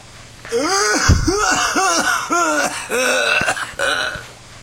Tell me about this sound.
a nice cough
cough, hack, ill, sick